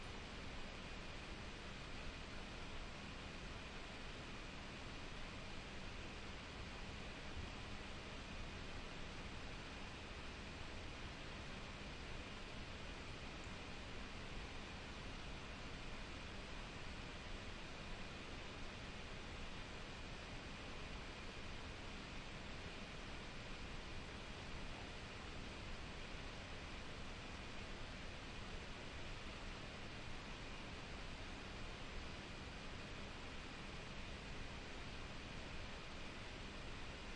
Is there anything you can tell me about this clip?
air con
Recorded a laptop fan for a large office scene as air-conditioning.